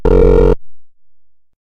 Once upon a time this was a drum sound that was processed through a Nord Modular synth.
beep, error, noise